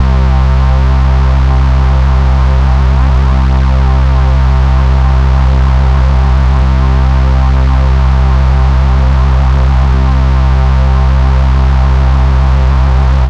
Bass loops for LuSH-101
Music, Drums, J-Lee, drum, 4x4-Records, Synthesizer, EDM, Stab, Dance, Kick, Electric